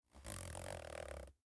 Wood Floor Rubbing 1 6
Design, Floor, Parquet, Real, Sneaking, Sound, Step, Walking, Wood, Wooden